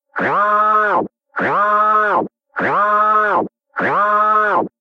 Alien Alarm
Big ole vocal flanger
space, warning, alarm, sci-fi, klaxon, siren, alert, alien, scifi